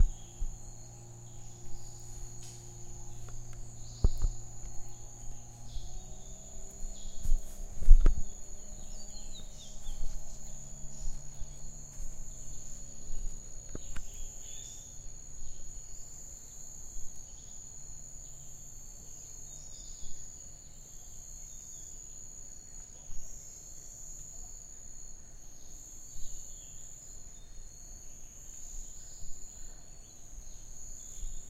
taman negara laser crickets
sounds of birds insects and miscellaneous rainforest creatures recorded in Malaysia's stunning Taman Negara national park. Uses the internal mic on my H4 Zoom.
birds, malaysia, rainforest